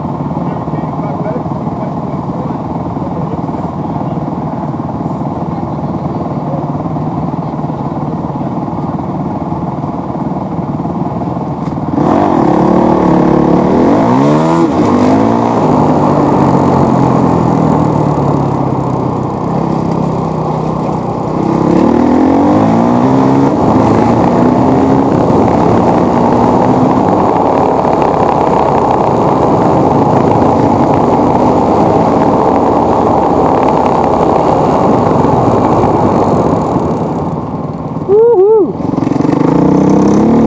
motorcycle dirt bike motocross onboard idle start accelerate fast
start, onboard, accelerate, idle, fast, dirt, motorcycle, bike, motocross